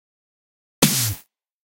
crispy dub snare
This one is very choppy. Sounds pretty good for a dub step snare!
Dub, Bass, Step, Instruments